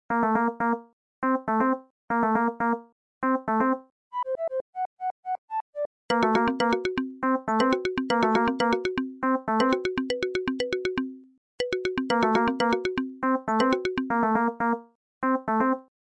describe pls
I am puzzled by this puzzle. Short 8-bit music loop made in Bosca Ceoil.